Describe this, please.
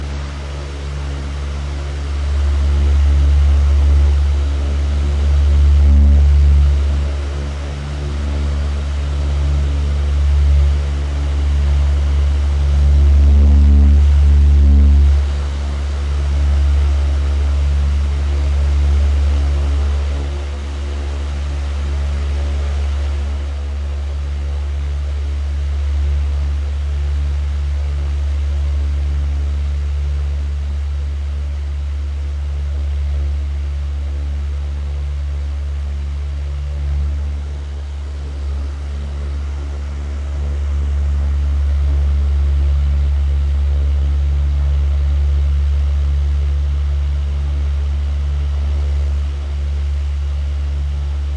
Air Fan Ventilador